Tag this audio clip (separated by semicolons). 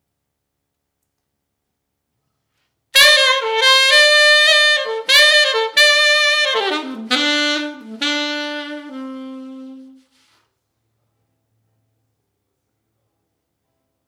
jazz; sax; riff